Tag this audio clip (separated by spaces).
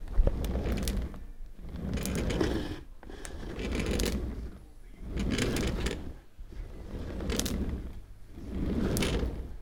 chair hardwood-floor wheels